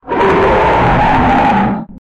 Dinosaur Loud Roar
An incredible dinosaur roar I formed from a ...... sneeze! The 101 Sound Effects Collection.
dino, roar, t-rex, stomp, step, breath, dinosaur, growl, creature, monster